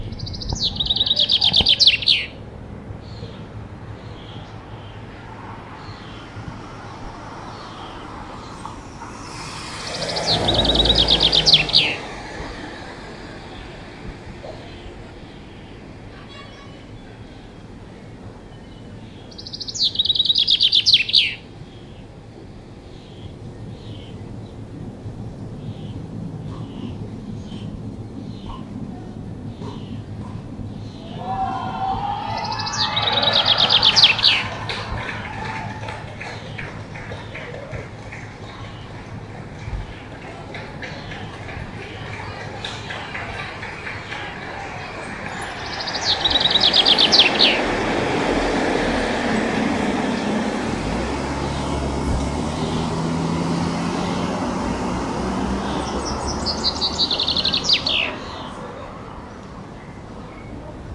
Chaffinch Male Bird Song
Recorded by a RODE NTG1 mic and ZoomH5 recorder.
Recorded on November 29th, 2015 in the Domain Park in Auckland, New Zealand.
Recorded at 18.30PM